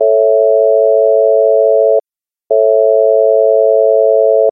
An equal tempered major chord followed by a chord with just intonation applied.